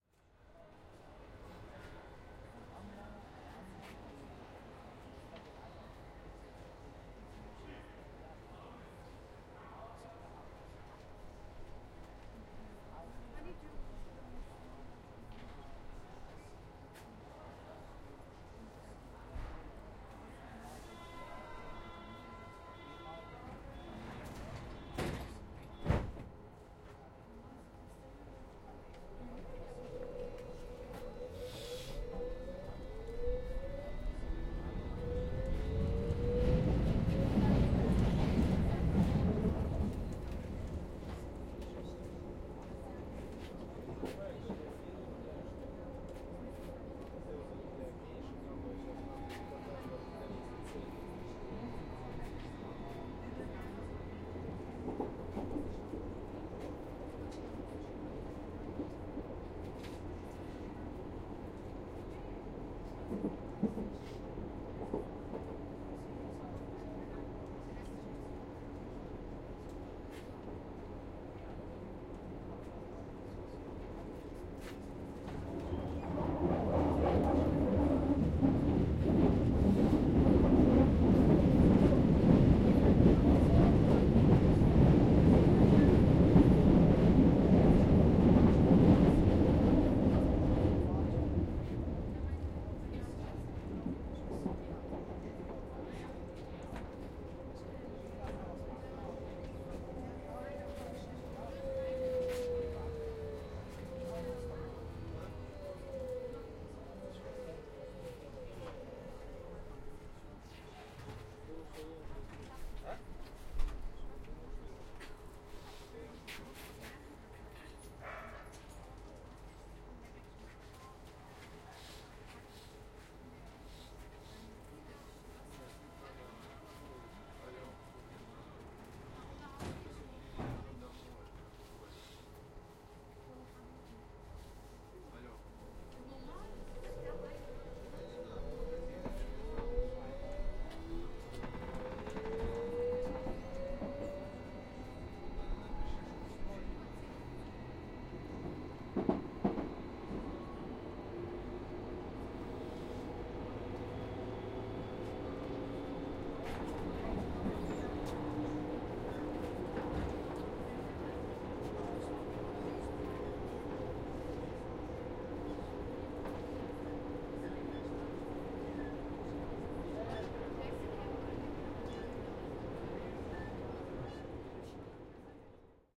The tram stops and starts again, some people are talking. There is a curve and a lot of noise from the tracks.